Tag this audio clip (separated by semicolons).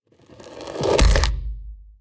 beast; creature; foreleg; heavy; horror; monster; run; scary; sounddesign; step; walk